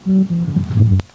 An assortment of strange loopable elements for making weird music. A snippet from a band practice cassette tape from 1987 with some electric bass.
lofi, noisy, bass, riff